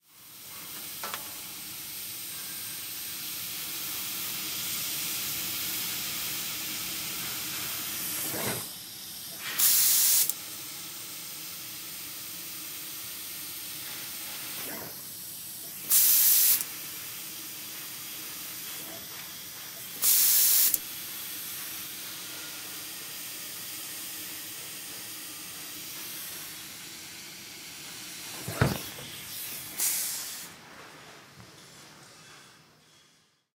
The subtle sounds of a lazer cutter
industrial, factory, machinery